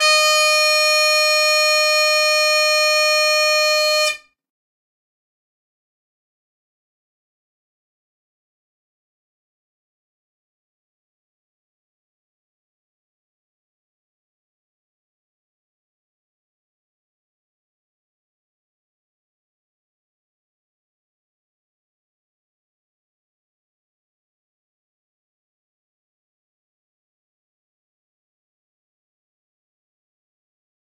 Bagpipe Chanter - C
Great Highland bagpipe chanter, C note.